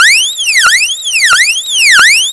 sirene alarm
It's an all synthetized sound, created on audacity. I wanted to recreat a basic emergency alarm, which can be use for cops, firemen or ambulance.
I started by creat a track, then I add Chirps. After, I duplicated the track and make a stereo of both. After, I copy and stick multiples times my sound. After, I chossed to raise the speed of the track, twice. I also modified a little bit the height. Then, I normalized the track.
Je pense que c'est un son répété formant un groupe tonique, au timbre harmonique éclatant voir acide, dynamique et au grain lisse.
ambulance, danger, siren, alarm, cops, police, warning, emergency